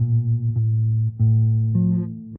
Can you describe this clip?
recording by me for sound example for my course.
bcl means loop because in french loop is "boucle" so bcl